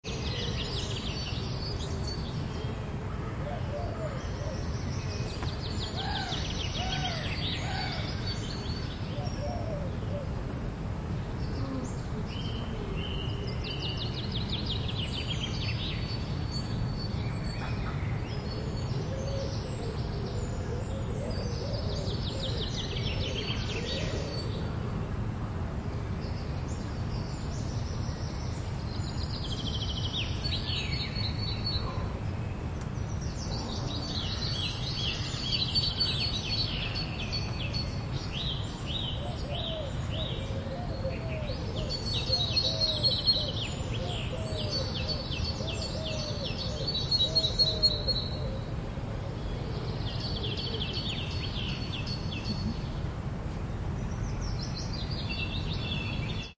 birds long Olympus LS3 Vögel lang
a short ambience sound
birds, bird